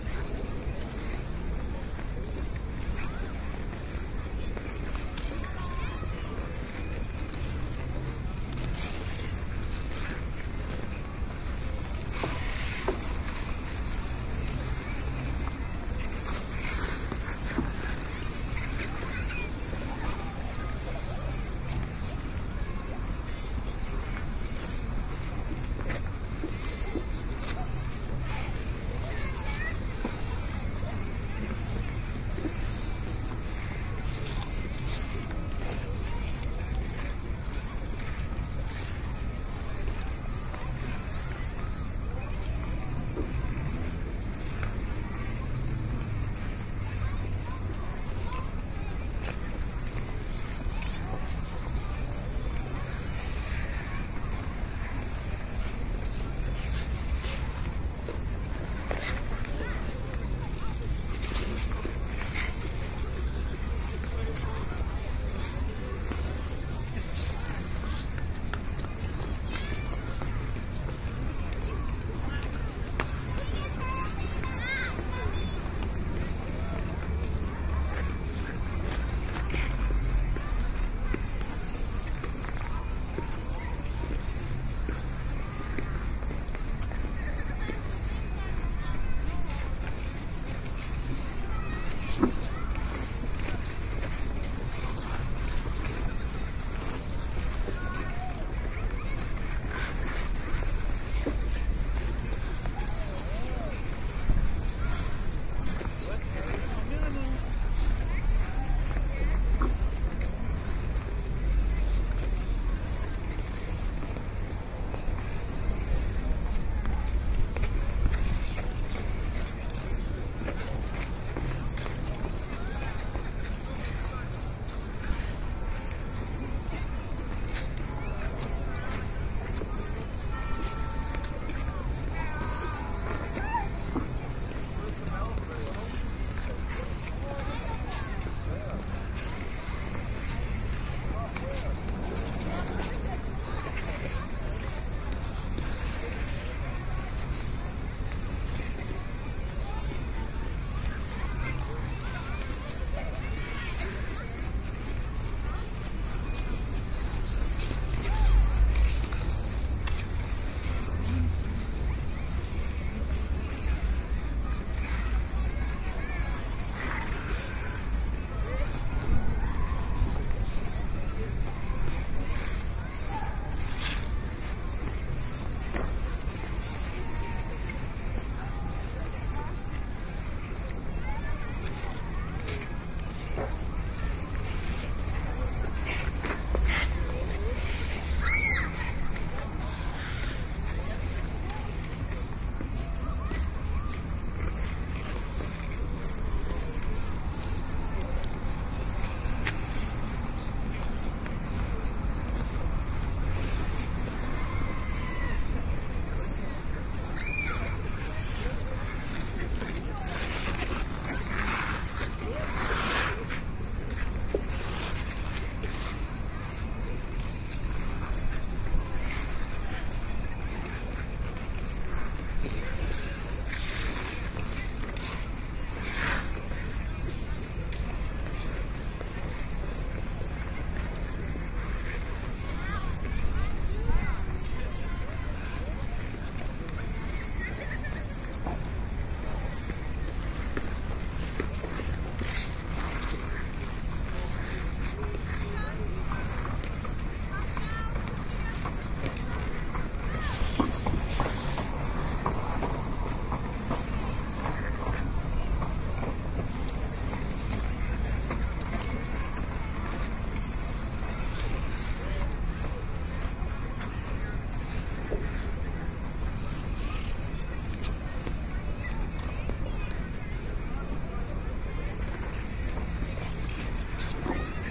Stereo binaural recording of an outdoor city ice rink.